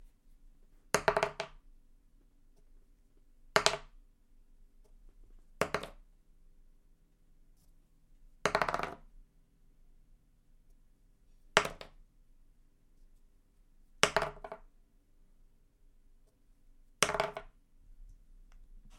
Dice Rolls 30cm
Plastic dice (1) rolling on a piece of paper on a wooden table (to simulate a carton board). Small, slightly treated room with reflective walls.